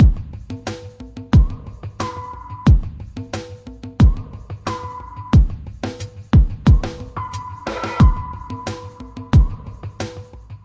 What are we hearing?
Ambient Groove 010
Produced for ambient music and world beats. Perfect for a foundation beat.
ambient, groove, loops